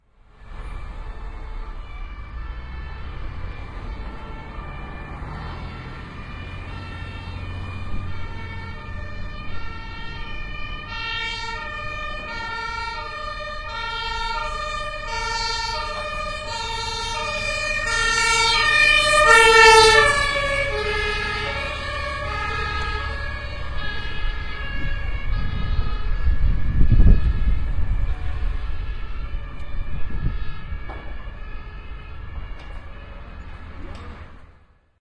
feuerwehr-faehrt-vorbei
An ambulance with siren. Recording location is Berlin, Germany.
siren, berlin